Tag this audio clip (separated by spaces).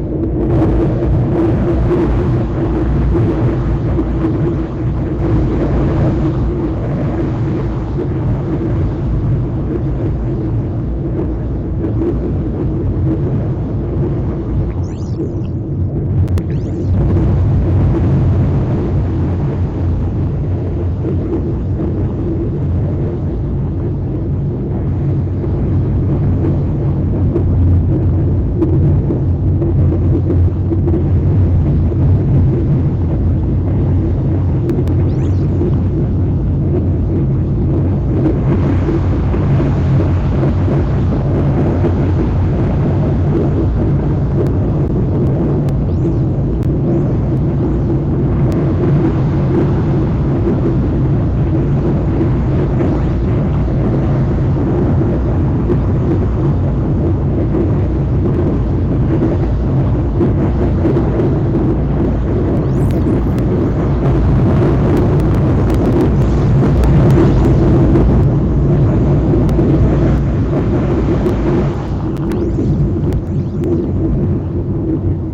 experimental generative sci-fi electronic processed ambience alien soundscape